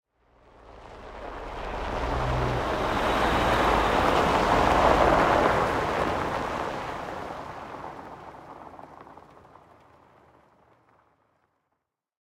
auto car passby slow on snow crunchy